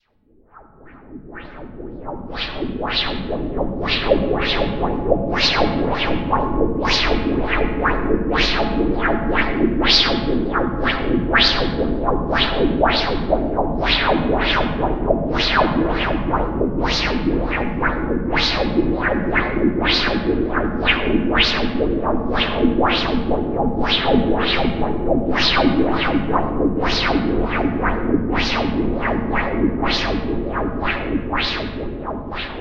Noise Cache 5
Another weird sound made by "wah wah-ing" and echoing Audacity's noise choices.
noise, psychic, space, weird